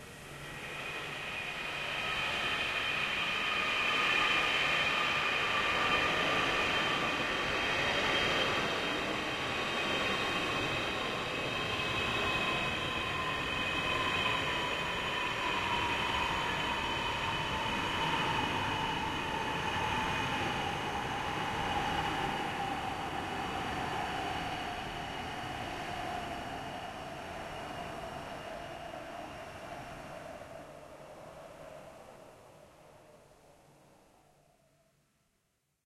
Distant Passenger Jet Landing
Sounds like a passenger jet landing that is relatively close. I made this in Audacity by recording myself blowing into the microphone, then distorting it and stretching it to sound like a jet.
audacity, blowing, distant, into, jet, landing, microphone, modified, muffled, passenger